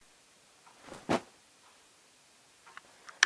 Throwing clothes on the floor #1
Throwing some pieces of clothes on the floor.
clothes, throwing